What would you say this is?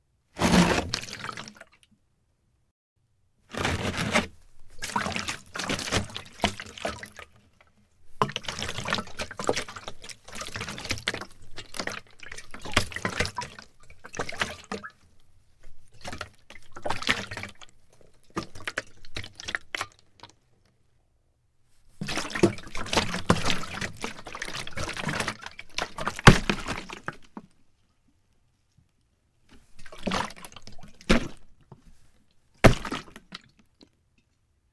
plastic gas container full heavy pickup scrape and slosh dry room2 busier sloshes
container, dry, full, gas, heavy, pickup, plastic, room, scrape, slosh